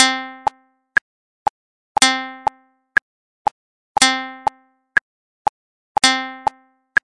Guitar Metronome
Guitar, Beat, Electro